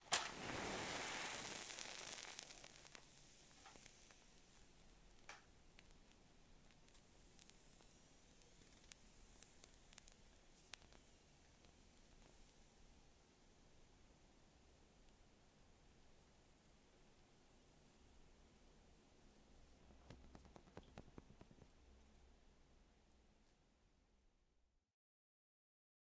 A nice and fat stereo sound that is a compilation of several match samples that I found here to give it more fatness.